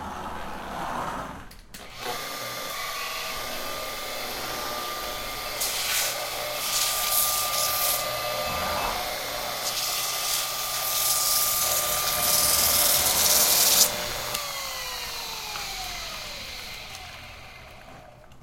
Seamstress' Round Knife Cutter
Recorded at Suzana's lovely studio, her machines and miscellaneous sounds from her workspace.
button, clothing, Cutter, design, fan, fashion, hanger, Knife, machine, room, Round, scissors, Seamstress, serger, sewing, tone